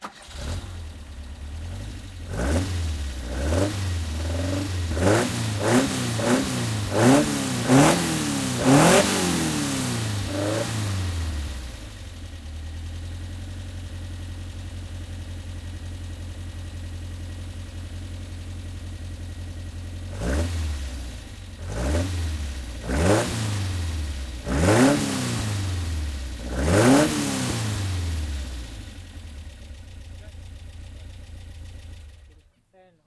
V8 Lotus Sports Car Auto Engine Revs
Sports car revving engine. Thanks to user wikusv for the recording. I did some de-clipping and M-S matrixing to turn it into a stereo file.
revving, sports-car, car-engine, engine-revs